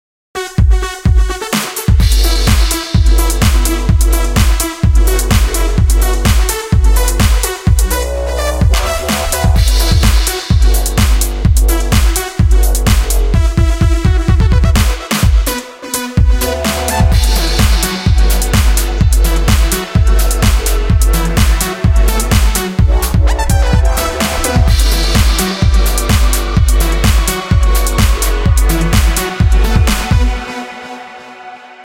Light Lerker Template 01
Heres a little house loop that was a track but there was so much work needed i just turned it into a loop. Enjoy! :D